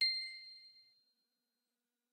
Created from a Yamaha keyboard with layering sounds. I used it as a wink for a cartoon dog